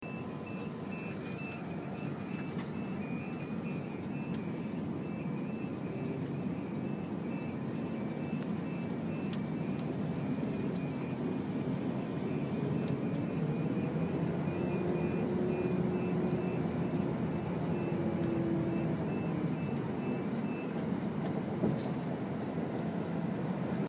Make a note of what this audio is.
Syncopating Alarms high pitched
High pitched syncopating alarms
Festival organised by the Norfolk and Norwich Sonic Arts Collective and originalprojects
alarm, alarms, emergency, great, high, nnsac, pitch, pitched, warning, yarmouth